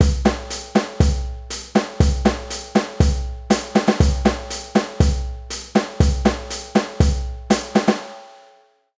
120 BPM basic drum beat. 4 measures long but loop-able. Made on Soundtrap, a MIDI track.